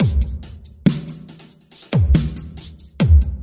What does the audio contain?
70 bpm drum loop made with Hydrogen